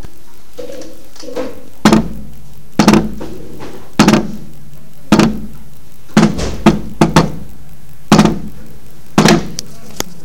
Zhe Coon Clang
Once upon a time one person invented a way of creating noise by lifting his tool up in the air and than letting it fall on too a wooden board. What you are about to hear is the product of this revolutionary invention.
bumping, clang, coon, hit, tools, wood, zhe